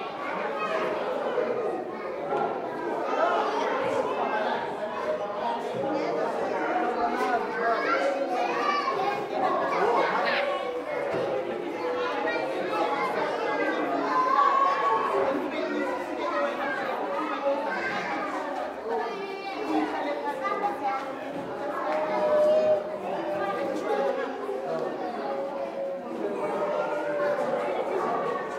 Hum of voices loud Zulu
Zulu voices recorded in a hall from about 20m. Mainly old folk with some kids. Voices raised, energy high. Indistinct dialogue. Recorded with Zoom H4
Zulu, elder, kids, hall, church